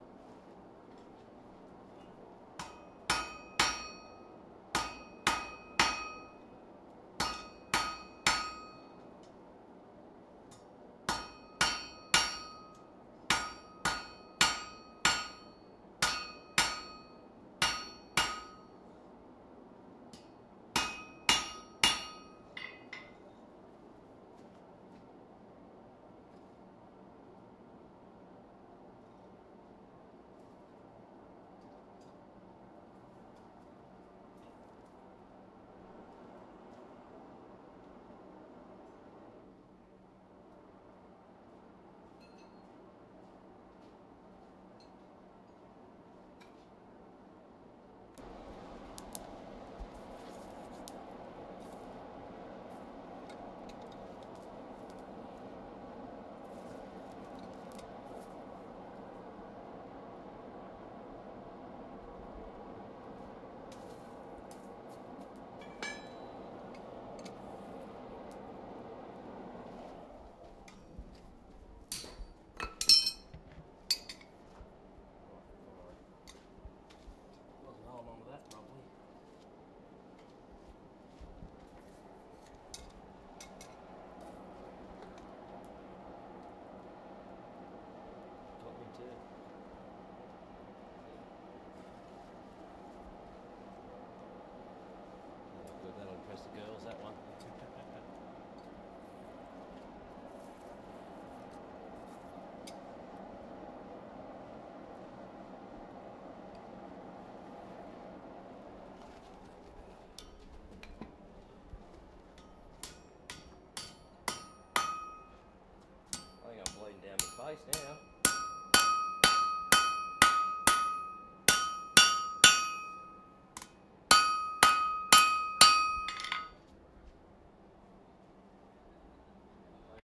Blacksmith Workshop
Various sounds recorded in a traditional blacksmith's workshop. Furnace can be heard in the background. Includes hammering a horse shoe on an anvil and cooling it in water. Also includes blacksmith getting a burn from the horse-shoe and talking about it! No post-processing.